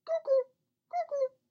Two vocal "cuckoos" recorded by me in Audacity.